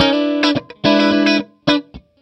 E7th 108bpm
Clean funky guitar at 108bpm. Strat through a "Fender Twin" miked with an SM58. Bridge+Middle pickup.